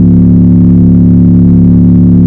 an electronic machine running